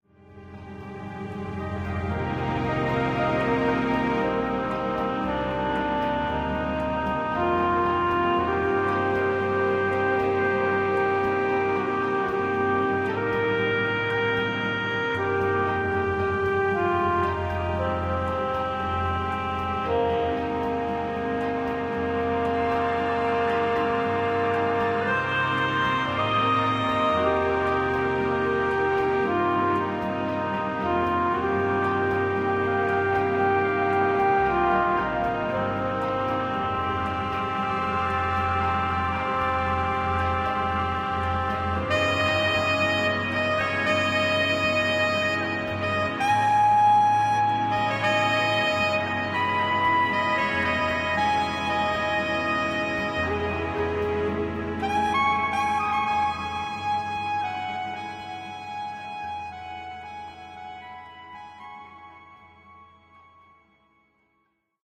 A short romantic love theme song with saxophone.